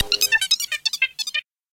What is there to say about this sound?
sonokids-omni 20
abstract, analog, analogue, beep, bleep, cartoon, comedy, electro, electronic, filter, fun, funny, fx, game, happy-new-ears, lol, moog, ridicule, sonokids-omni, sound-effect, soundesign, speech, strange, synth, synthesizer, toy, weird